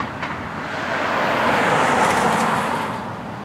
car pass
I recorded this when i was making a video and messed up and just recorded a car but it sounded good
camera, car, me